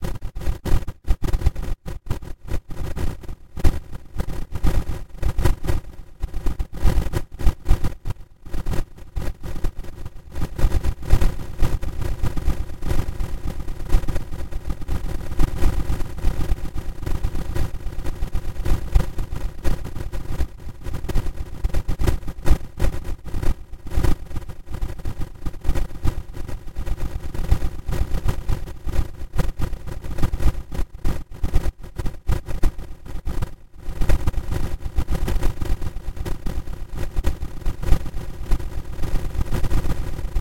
it's synthesized sound
starting, motor, broken, drive, engine, rattles, appliance, inoperative, machine